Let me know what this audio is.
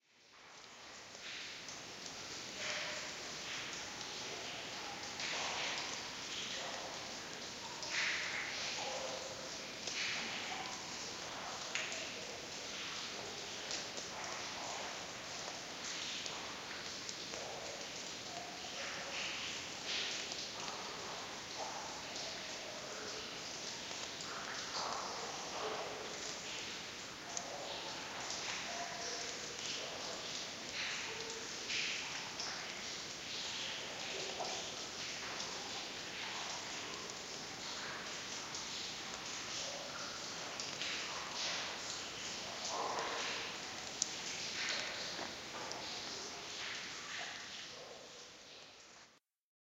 A recording I made inside a very wet welsh slate mine.